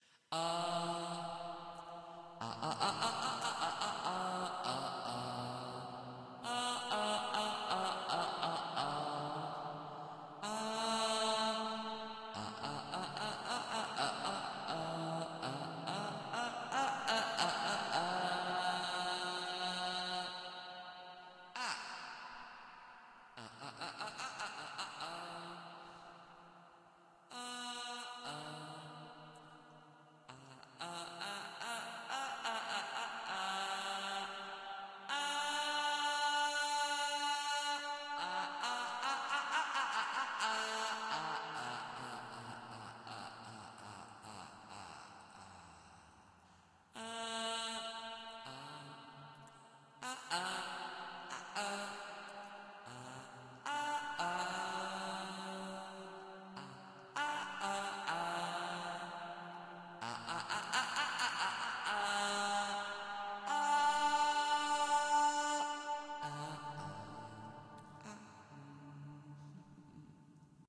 FX A a a a singing computer
abstract, ambiance, ambient, digital, dull, effect, electric, electronic, freaky, future, fx, lo-fi, loop, machine, noise, sci-fi, sfx, sound, sound-design, sounddesign, soundeffect, strange, weird